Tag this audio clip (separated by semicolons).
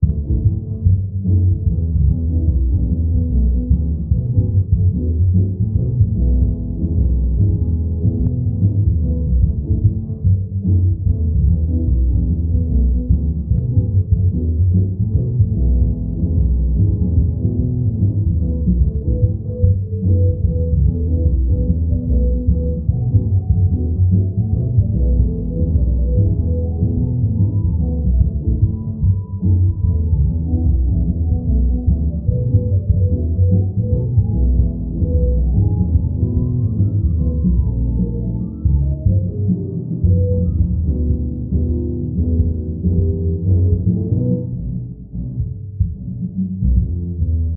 jazzy; Jazz; music; game; videogamemusic